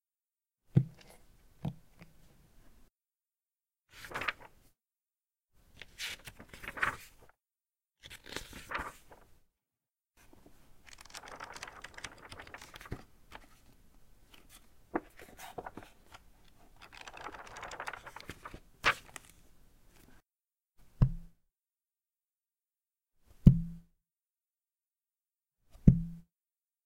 Book Pack
The sounds of a large leatherbound book.
Actions:
1. Picking up
2. Flipping page one by one
3. Flipping through multiple pages
4. Closing the book
book, closing, page, pick, turn